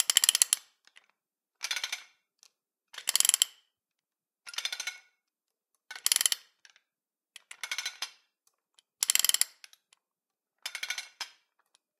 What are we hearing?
Chain pulley 750kg - Pulled up and down 4
Chain pulley pulled up and released four times.
80bpm, metalwork, field-recording, 4bar, chain, chain-pulley, tools